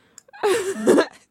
This is my friend's laughter.